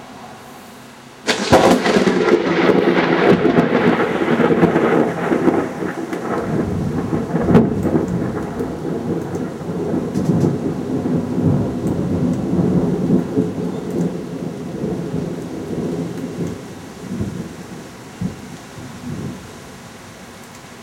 12th Oct 2007 the first storms of the wet season are starting. This was taken as I sat working.